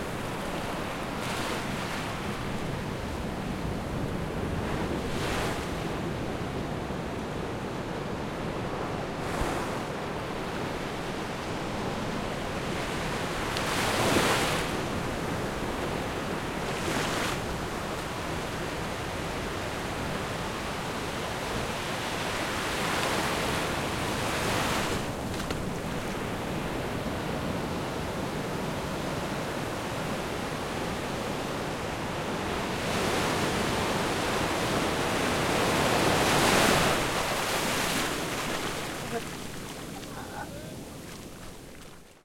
playa:ixtapa:beach:inTheBeach #3
beach, soundscape, water